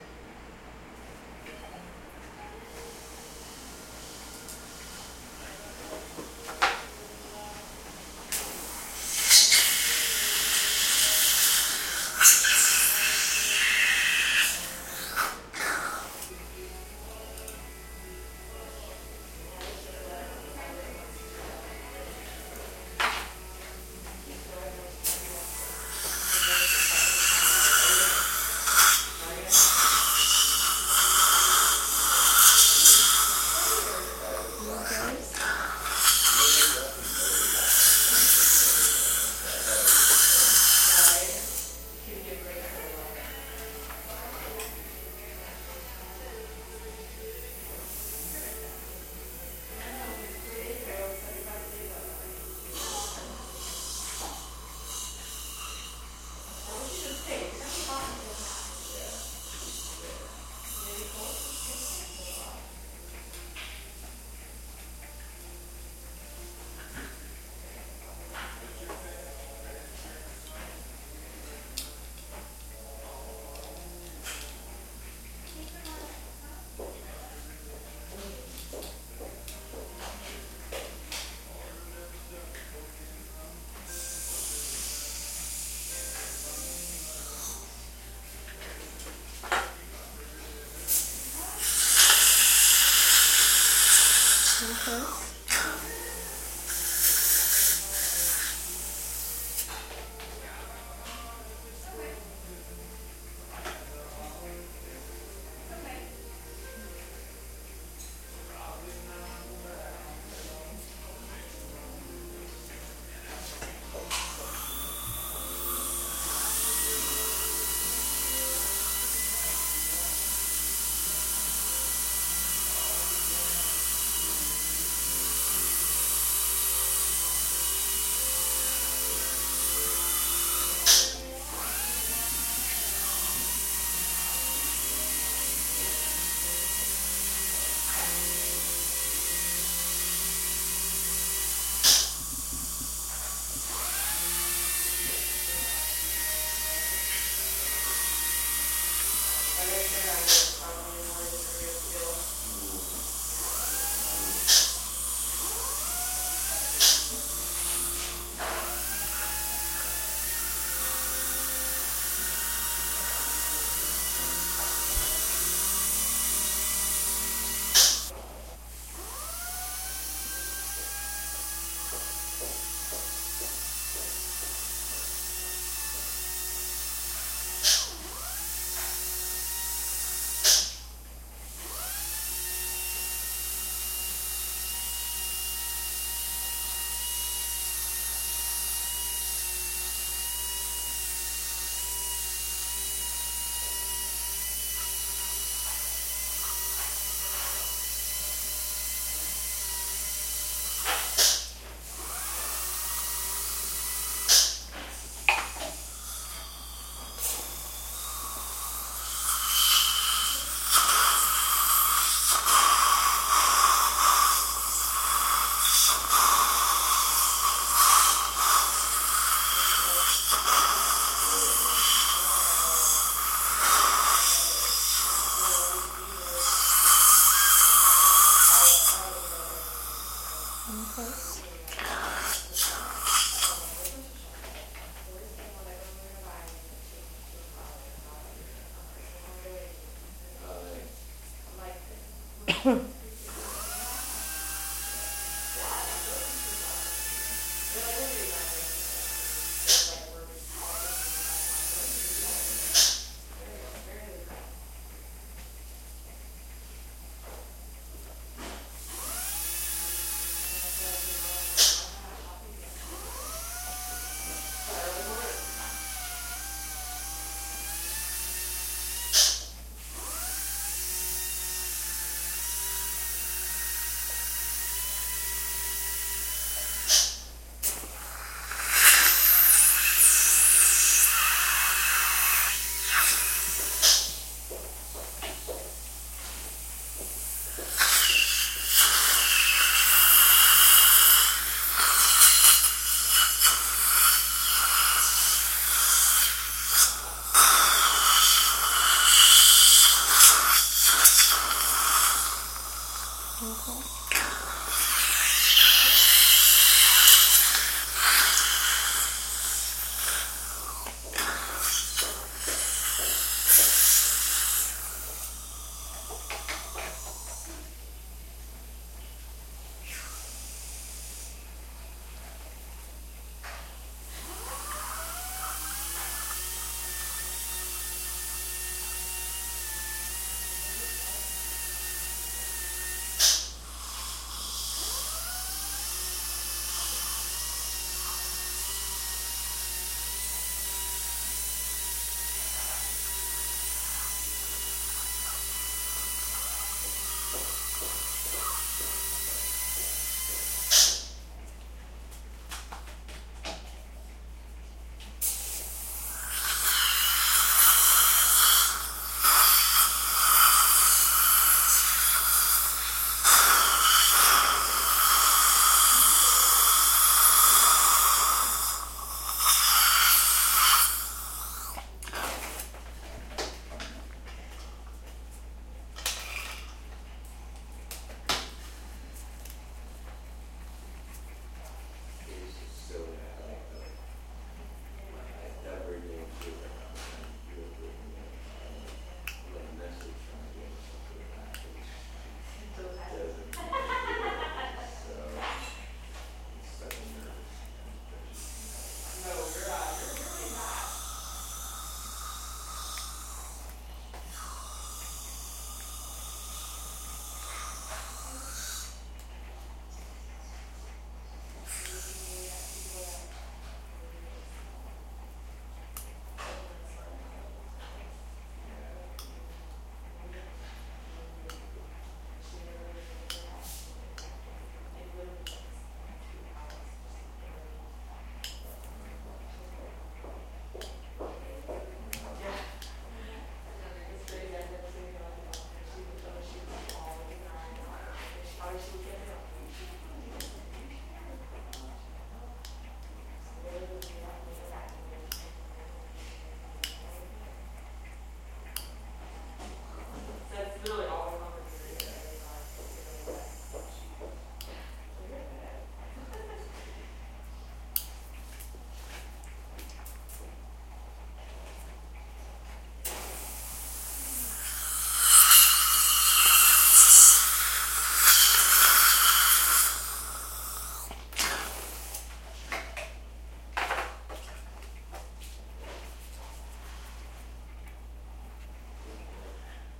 dental-procedure1
Recent visit to dentist, very close mic'ing. ambient noise in background
cleaning, dental, dentist, drill, teeth, teeth-cleaning, tooth